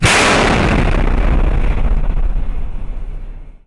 xm360 canon shot 2 less echo
army, fight